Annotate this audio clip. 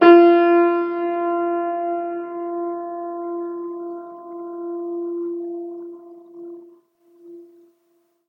88 piano keys, long natural reverb: up to 13 seconds per note
THIS IS ME GIVING BACK
You guys saved my bacon back in the day. Recently I searched for free piano notes for a game I'm making, but the only ones I could find ended too quickly. I need long reverb! Luckily I have an old piano, so I made my own. So this is me giving back.
THIS IS AN OLD PIANO!!!
We had the piano tuned a year ago, but it is well over 60 years old, so be warned! These notes have character! If you want perfect tone, either edit them individually, generate something artificially, or buy a professional set. But if you want a piano with personality, this is for you. being an old piano, it only has 85 keys. So I created the highest 3 notes by speeding up previous notes, to make the modern standard 88 keys.
HOW THE NOTES WERE CREATED
The notes are created on an old (well over 50 years) Steinhoff upright piano. It only has 85 keys, so I faked the highest 3 keys by taking previous keys and changing their pitch.